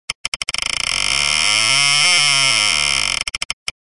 Creaky door opening slowly.
Click here to animate this sound!
wooden, Door